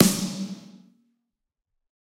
Snare Of God Wet 027
drum, drumset, kit, pack, realistic, set, snare